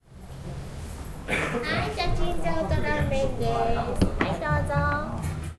0397 Japanese Restaurant waitress
Waitress brings the foot in a Japanese restaurant.
20120807
cutlery, japanese, tokyo, japan